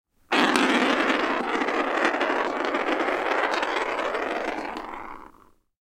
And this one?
Marble, Rolling on Wood, A
Audio of a glass marble rolling around on a wooden floor. I recorded this for a screen scoring and sound design recreation task for the 2016 short film "Dust Buddies", the result can be seen here.
An example of how you might credit is by putting this in the description/credits:
The sound was recorded using a "Zoom H6 (XY) recorder" on 12th April 2018.
wooden, roll, rolling